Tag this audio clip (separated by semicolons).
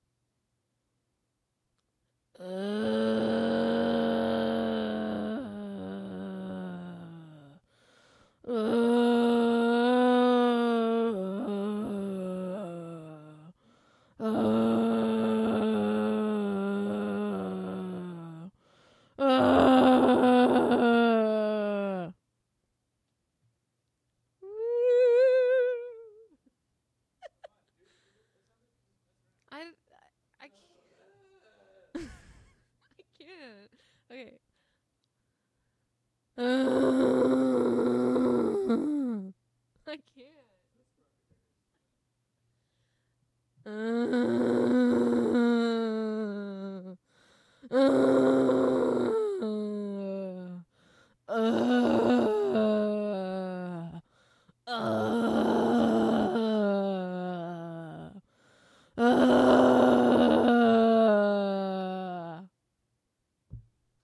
brains; dead; zombie